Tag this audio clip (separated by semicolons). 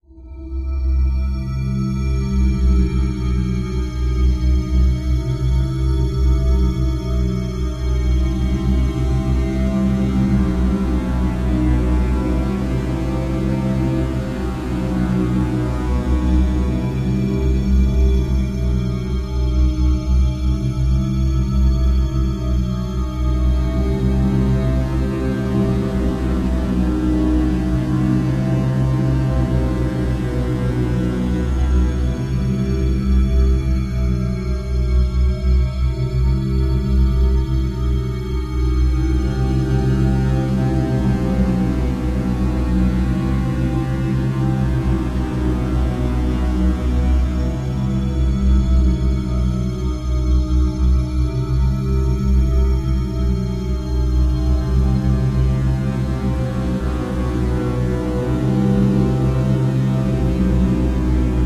horror; scary; weird; spooky; loop; ambient; creepy; sinister